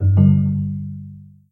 Alert Chime 2
Part of a games notification pack for correct and incorrect actions or events within the game.